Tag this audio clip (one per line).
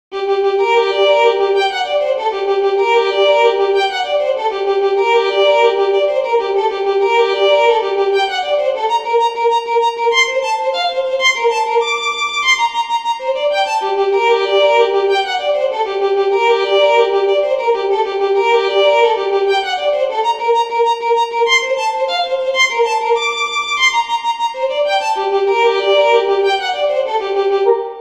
Violin
Film
Sound